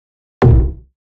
Kick effect,is perfect for drum machine,cinematic uses,video games.Effects recorded from the field.
Recording gear-Zoom h6 and Microphone - RØDE NTG5
REAPER DAW - audio processing